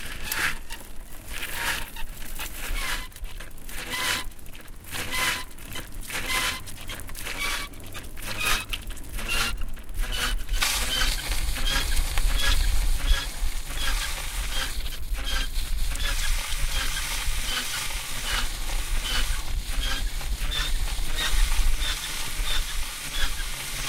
31.10.2014 - 01-30 UHR - FAHRRAD QUIETSCHT WEIL DRECK IN SCHUTZBLECH
These samples were made with my H4N or my Samsung Galaxy SII.
I used a Zoom H4N mobile recorder as hardware, as well as Audacity 2.0 as Software. The samples were taken from my surroundings. I wrote the time in the tracknames itself. Everything was recorded in Ingolstadt.
ambiance, field-recording, nature